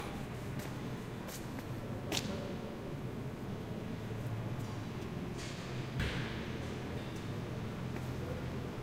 Short clip of ambiance inside the American Steel building in Oakland, CA which is truly massive inside. Sorry the length is so short I was mainly just testing my new mic & field recorder out. This is one of the first things I ever recorded.
Rode M3 > Marantz PMD661